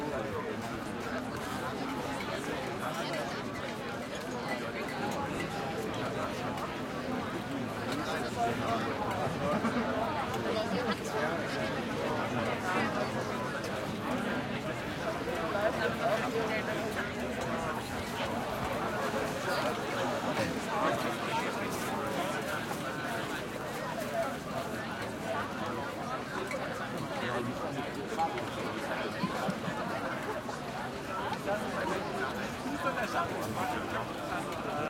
outdoor community festival crowd ext medium meal time active walla german and english voices eating spoons hit metal bowls3 calm murmur
ext, festival, community, crowd, walla, outdoor, medium